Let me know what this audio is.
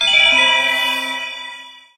Ableton Game Sound Effects 09 02 2015 23
made in ableton live 9 lite
- vst plugins : Alchemy
you may also alter/reverse/adjust whatever in any editor
please leave the tag intact
game sound effect sfx games effects 8bit 16bit classic sample
16bit, sfx, effects, sample, effect, classic, sound, games, 8bit, game